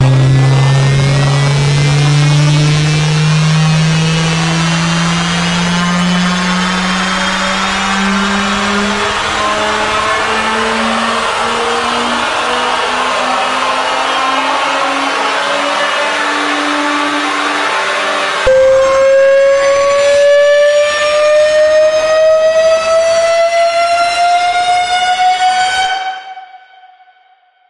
Jet-Fighter FX
This sound was created with layering and frequency processing.
BPM 130
Air, Aviation, Build, Flight, FX, Jet-Fighter, Overclocking, SFX, Sky, Sound-effect, Special-effect, Up, Warbird